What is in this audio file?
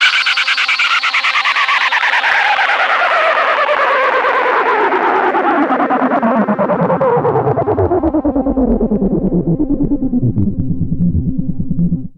Harsh, distorted dropping filter sweep from a Clavia Nord Modular synth.
bleep, clavia, distorted, drop, filter, fx, harsh, modular, sweep